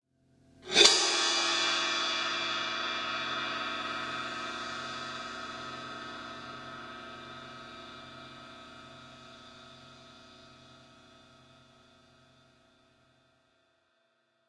cYmbal Swells Royer-034
i was demoing the new Digital Performer 10.1 with a Royer R-10 pair in Blumlein array but wanted to check out one of the ribbons because it possibly sounded blown so i went ahead not bothering to create a new mono file. the mic was tracked through a Yamaha mixer into Digital Performer via a MOTU 624. i have various cymbals including a Paiste hi-hat and a Zildjian ride which i bowed or scraped. there is an occasional tiny bit of noise from the hard drive, sorry. it has the hiccups.
some of these have an effect or two like a flange on one or more and a bit of delay but mostly you just hear the marvelous and VERY INTERESTING cymbals!
all in my apartment in NYC.